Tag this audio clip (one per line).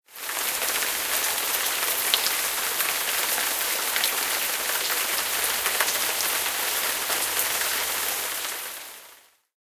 drops
outside
rain
water